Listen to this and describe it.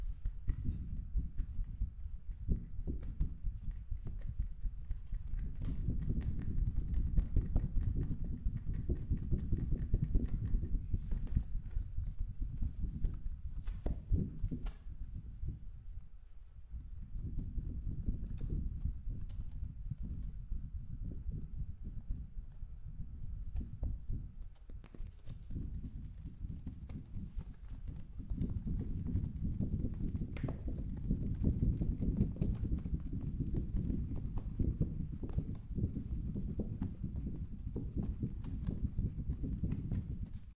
Deep Weather
A big carton board was held by two people, and then shaken to produce the deep rumbling sound. Various ways of shaking and holding it, resulted in a very close thunder sound.
A Zoom H6 recorder, with the XY Capsule was used to record it, inside of a classroom.